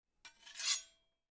anvil draw long 2
The sound of what I imagine a sword/steel bar drawn across an anvil would probably make. This was created by hitting two knives together and resampling it for a lower pitch. This sample is a little longer than the others.
processed, anvil, metal